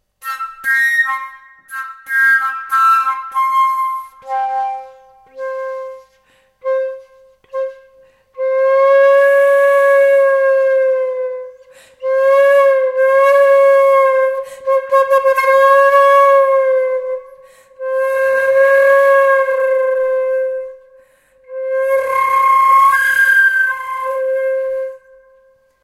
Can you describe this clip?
Flute Play C - 06
Recording of a Flute improvising with the note C